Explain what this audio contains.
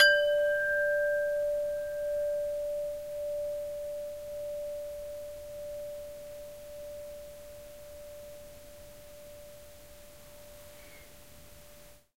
Single strike of a wind chime, note c4